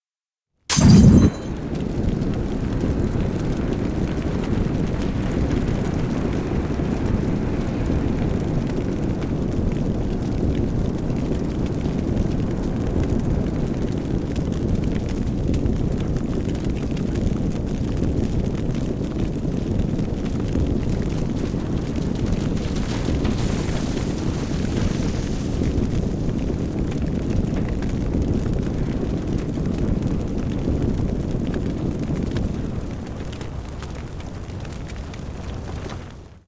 A recording of a Molotov cocktail used in anger.
whoomph, gasoline, fuel, molotov-cocktail, bottle, petrol, incendiary-device, improvised-incendiary-device, request, incendiary